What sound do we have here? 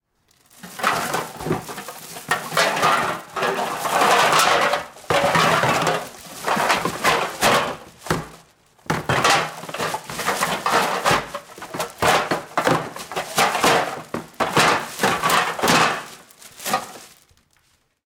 Bag of cans
Me picking up a plastic bag of recycled cans
recycle, can, aluminum, bag, aluminium, Cans, plastic